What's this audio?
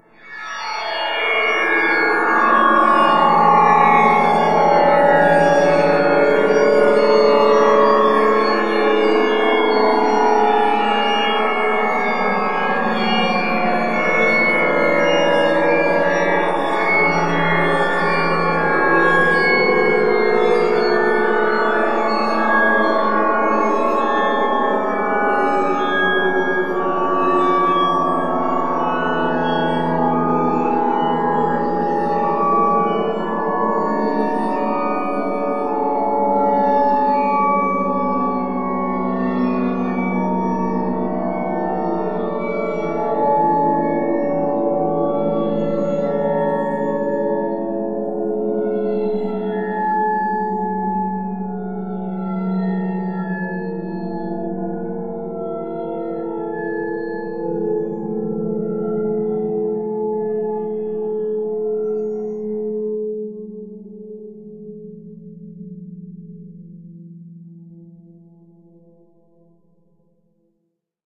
clarh tstch new S 03 EVILharmony!!!!!!!
A friend was travelling, stayed over, and brought a battered clarinet (they play saxophone usually)- I sampled, separated a few overtones, and put them back together.
chord, clarinette, melody, processed